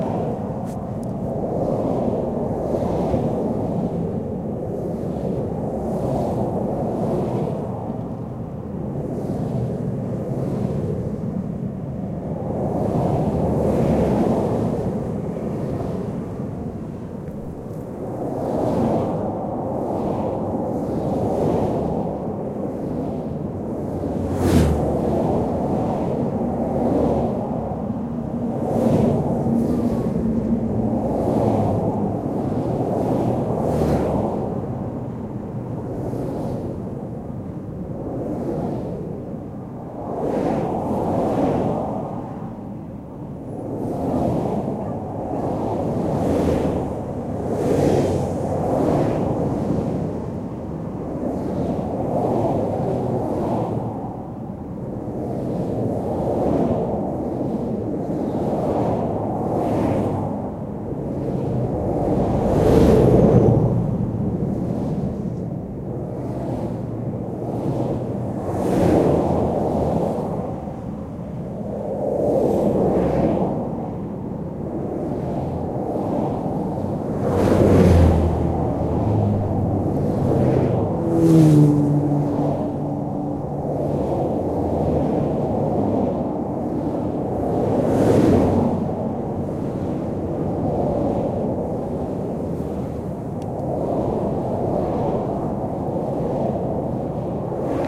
On the highway north from Bologna, Italy I recorded cars rushing by, recorder laid down on the ground only 1 meter away from where the cars passed by. Recorded with an Olympus LS-10.
car, cars, field-recording, highway, noise, outdoor, street